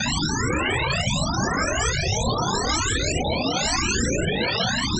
Coagula Science! 6 - Charging Bubbles
Synthetic sound.
Made in Coagula.